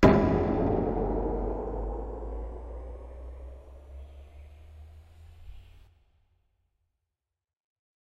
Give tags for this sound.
hit field-recording

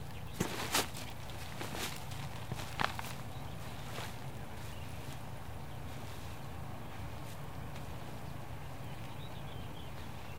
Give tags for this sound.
footsteps outside step walking

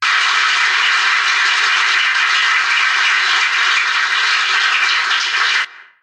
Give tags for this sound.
cheers claps cheering applaud polite hand-clapping theatre applause auditorium cheer crowd applauding foley audience clap clapping

